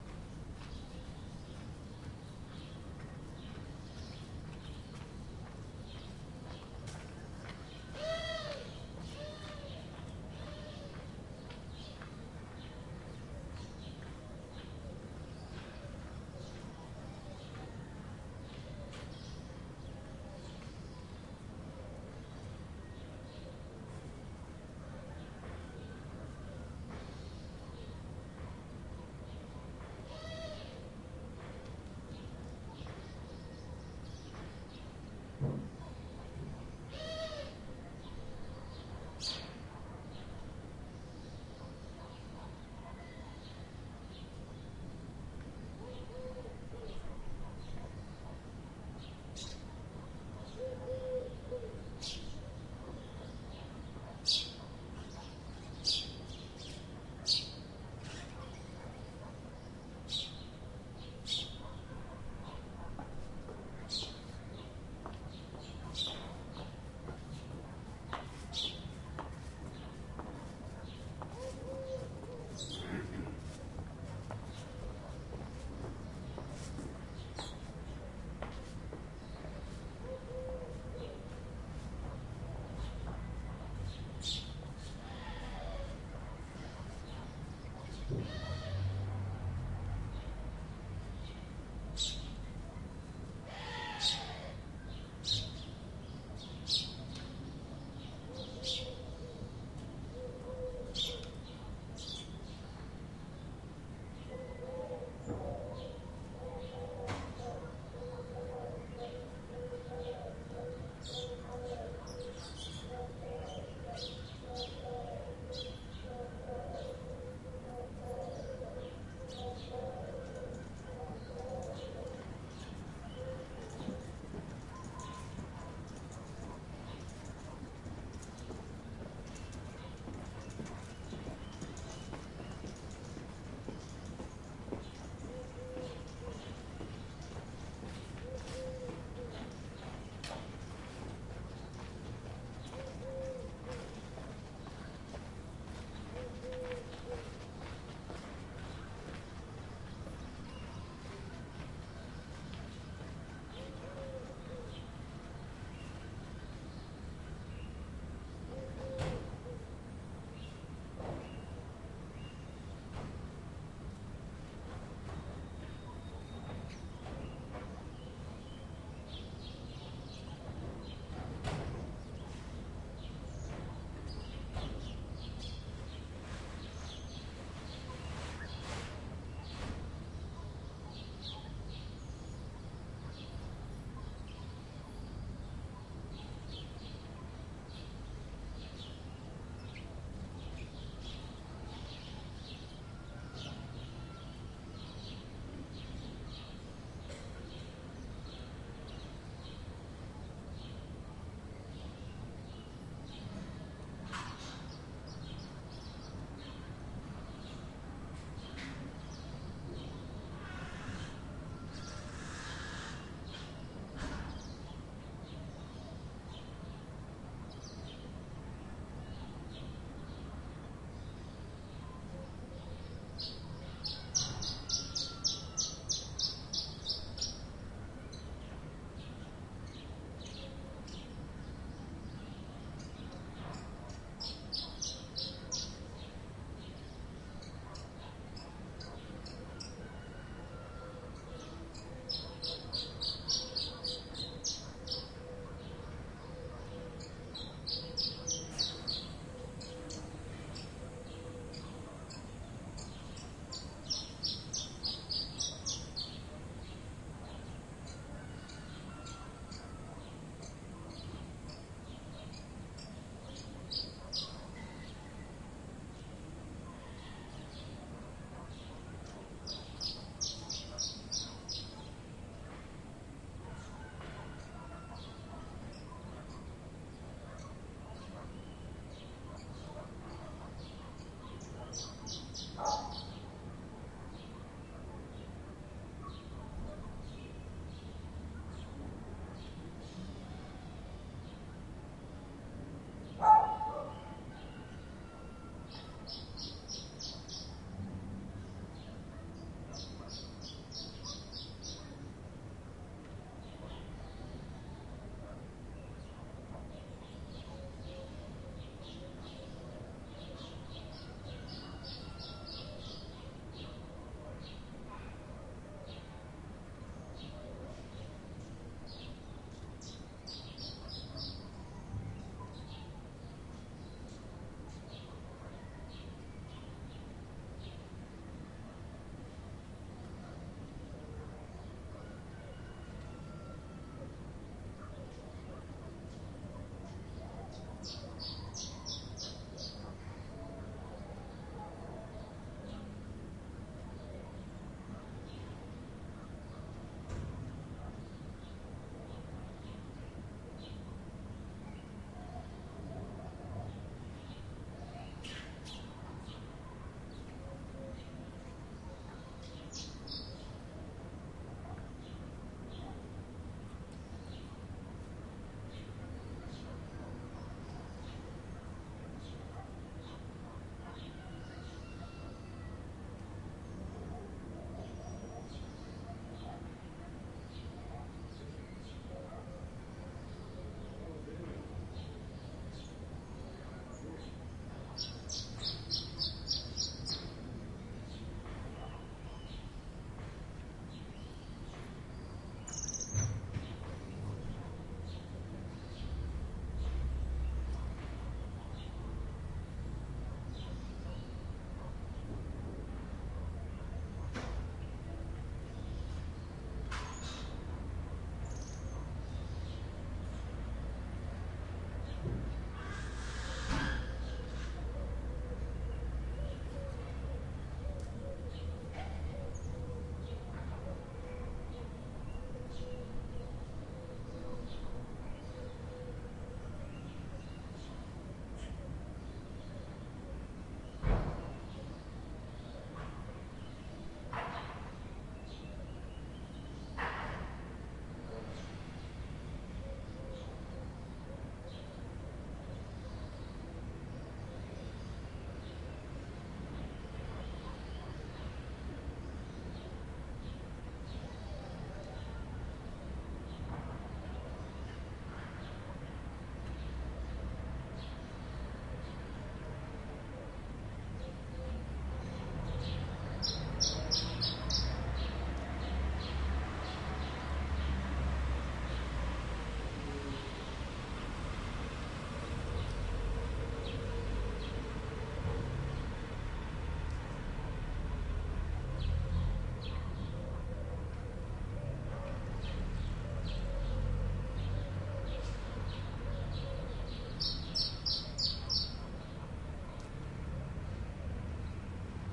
100801-GCSR-agumrkmo-1
Early morning on the town square of Agüimes on the island of Gran Canaria.
Recorded with a Zoom H2 with the mics set at 90° dispersion.
This sample is part of the sample-set "GranCan" featuring atmos from the island of Gran Canaria.